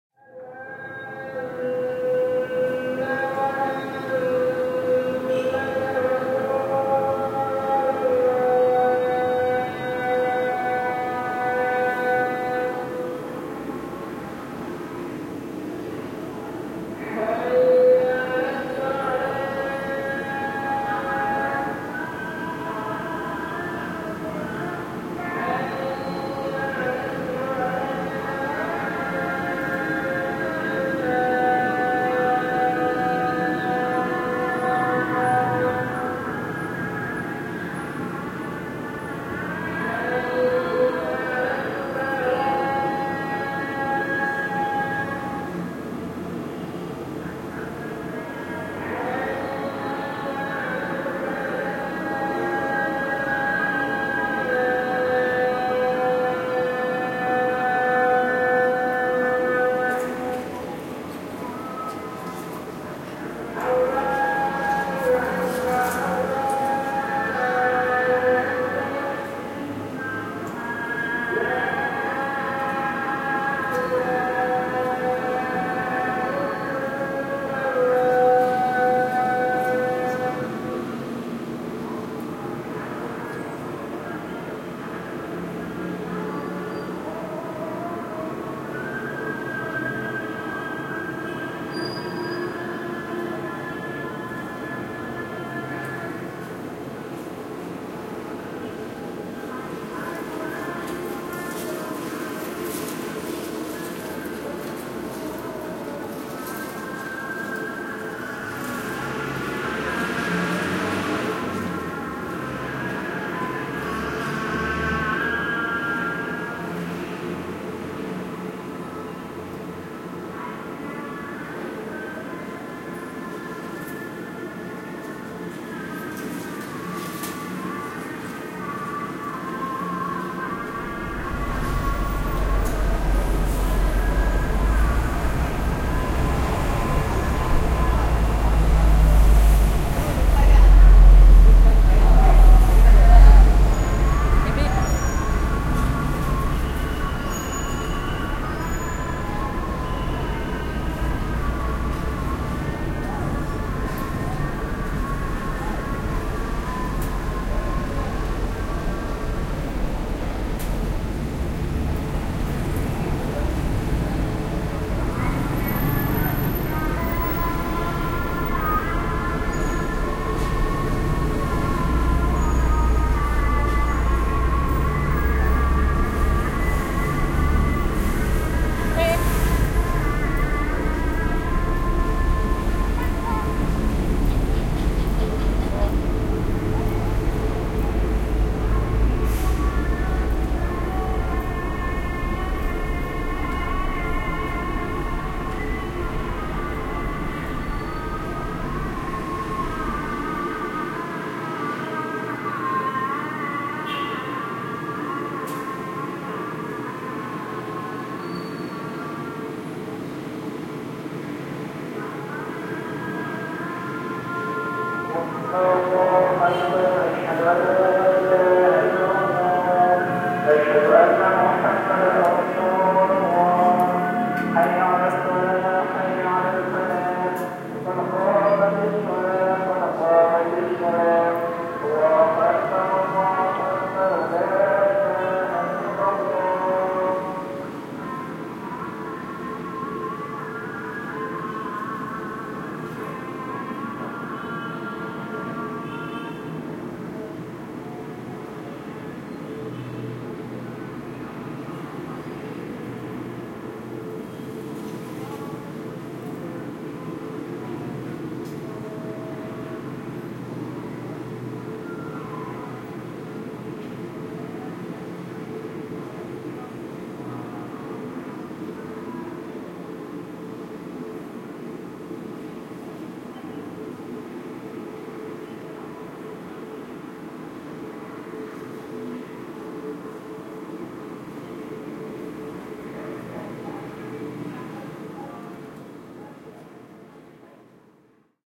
Field recording in the city of Yogyakarta, Indonesia during august 2007. It is the moment of the call to prayer. There are many voices at the same time coming from different points of the city making the call. We can also hear some noise from the traffic and other people's voices.Recorded with Edirol R-09 and built-in mics.